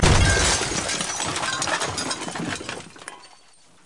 Window break
This is a sound of a window being broken.
glass, crash, shatter, breaking